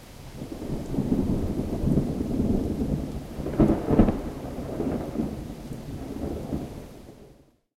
NYC Rain Storm; Some traffic noise in background. Rain on street, plants, exterior home. Interior Perspective